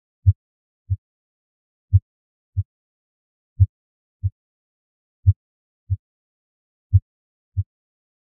Heartbeat Loop
Static (i.e. no HRV patterns) heartbeat-like sound generated with BeeOne.
golden-ratio, loop, heartbeat